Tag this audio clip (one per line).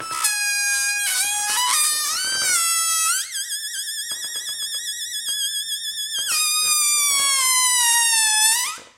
fun
inflate
noise
balloon